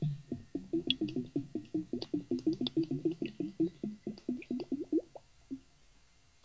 After I turn off the water into the bathtub, this is the sound I hear. Recorded with a Cold Gold contact mic attached to the spigot that goes into the tub, into a Zoom H4 recorder.
bath, bathroom, contact, drain, drip, glug, gurgle, liquid, tub, water